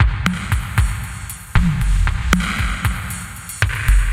reverb house beat 116bpm with
reverb short house beat 116bpm